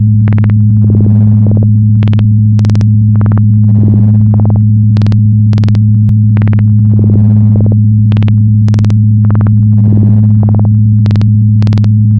Background Ship Noise
An ambient background possibly of a destroyed ship. A subtle alarm can be heard in the background. I made these in audacity from some dtmf tones.
Movie, Ambient, Noise, Cinematic, Drone, Space, Film, Free